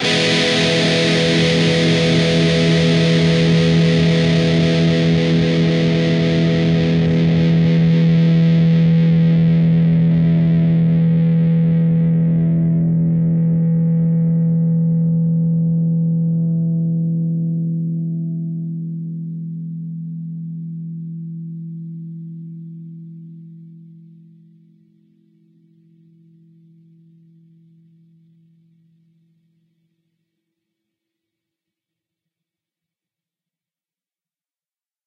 Dist Chr EMj up
A (5th) string 7th fret, D (4th) string 6th fret, G (3rd) string, 4th fret. Up strum.
chords, distorted, distorted-guitar, distortion, guitar, guitar-chords, rhythm, rhythm-guitar